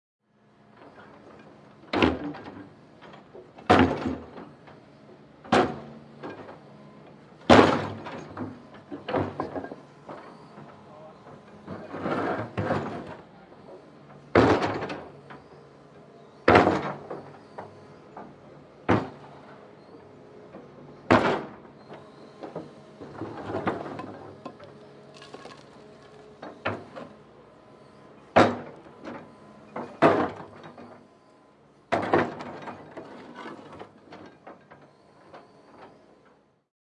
Digger smashing concrete
Recorded on Marantz PMD661 with Rode NTG-2.
The beautiful sound of a large digger moving around concrete water mains and destroying them.